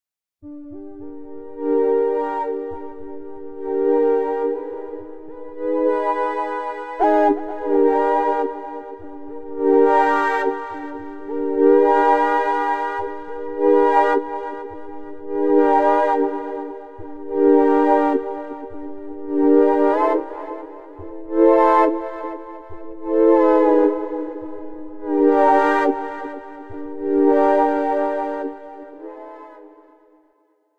Mystery synth solo 125BPM
melody, synth, pad, mystery, Suspenseful, synthetizer, mysterious